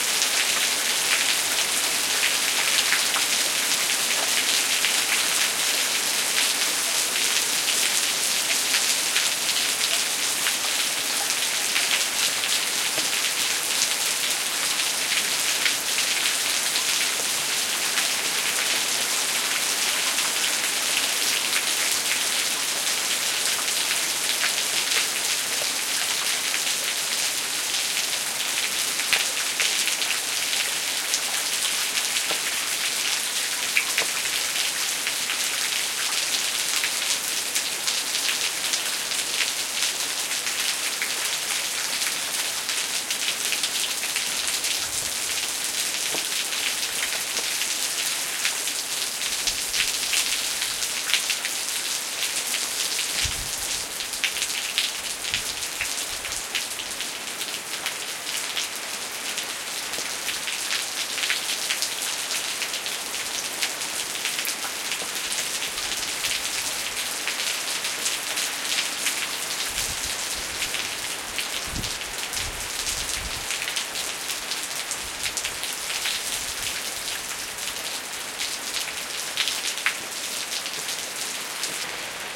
Field recording of rain, using Sony Digital videocamera (stereo)and a Sony Stereo-mic with a good wind-shield
Atmosphere, Countryside, Field, Rain, recording